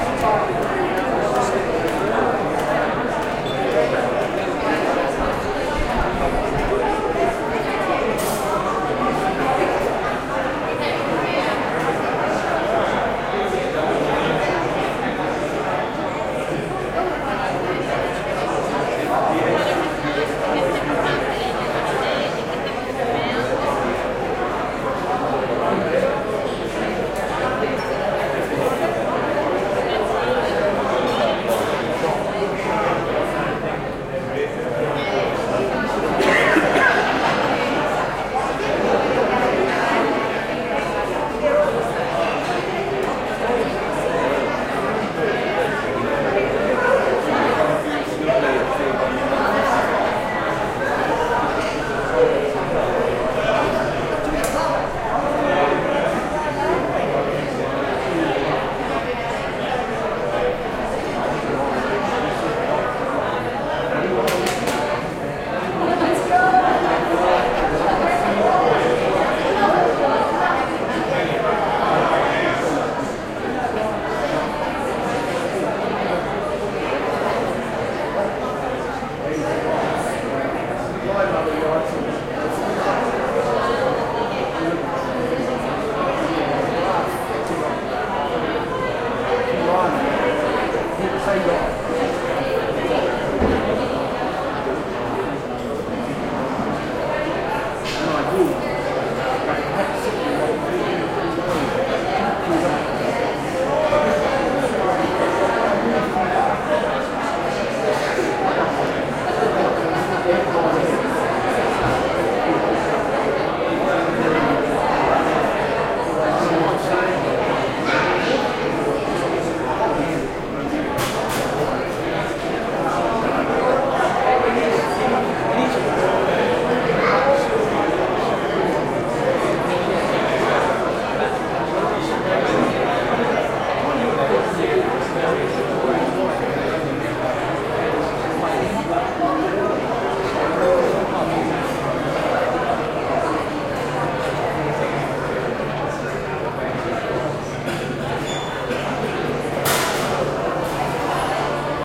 crowd int medium restaurant gallery museum theatre lobby active echo
restaurant, gallery, int, medium, theatre, echo, China, crowd, museum, active, lobby